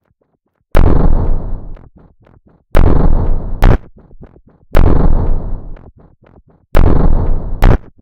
A four bar electronic loop at 120 bpm created with the Massive ensemble within Reaktor 5 from Native Instruments. A loop with an industrial electro feel. Normalised and mastered using several plugins within Cubase SX.